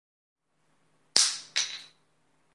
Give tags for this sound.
thing slams drops hits soundeffect floor wood

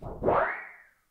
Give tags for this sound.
metal sound whistle

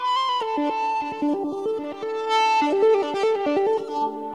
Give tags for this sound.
ebow processed

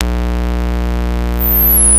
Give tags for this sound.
progressive goa

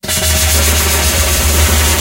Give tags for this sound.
120-bpm loop drone-loop drone ambient rhythmic-drone

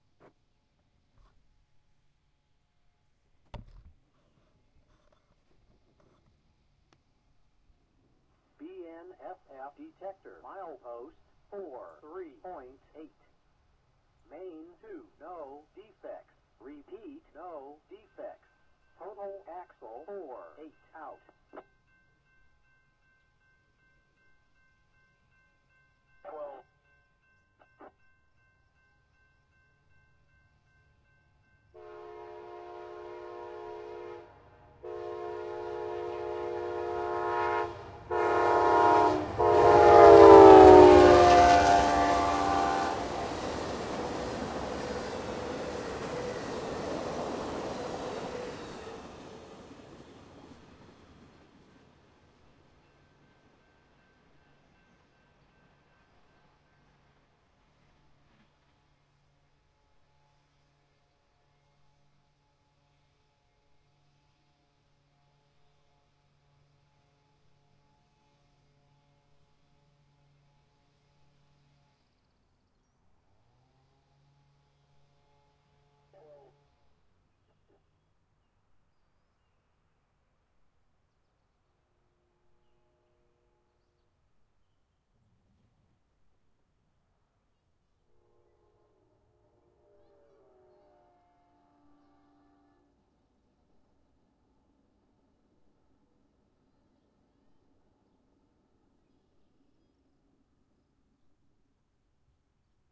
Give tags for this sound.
horn bristol doppler 25 2011 may il train chief southwest 334 pm

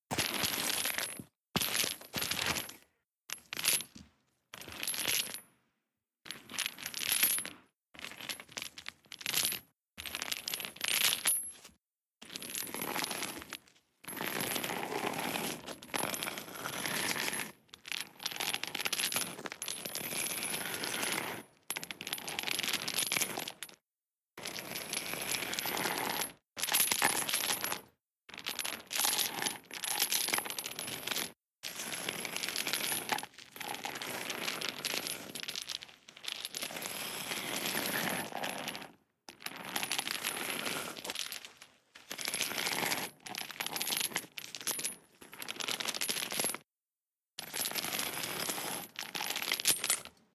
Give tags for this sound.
heavy field-recording chain metal

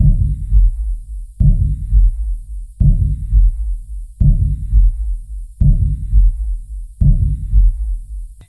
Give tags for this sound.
slow beat scary alien deep

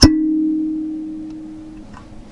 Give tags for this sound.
kalimba metallic thumb-piano

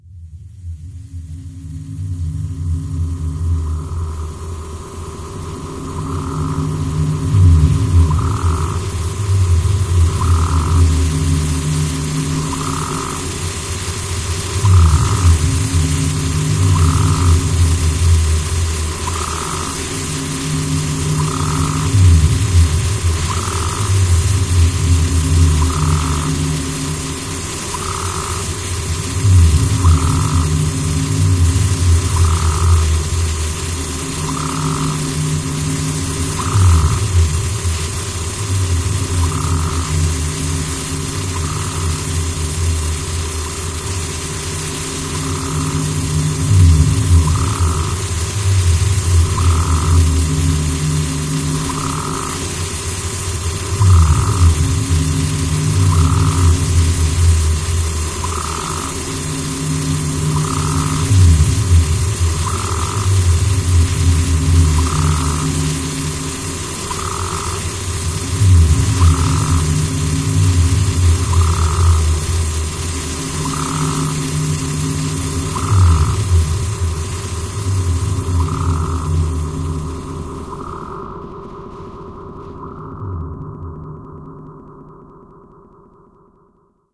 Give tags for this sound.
abstract vague night-time forest soundscape creepy surreal drone noise strange weird hypnotic repetitive collage complex

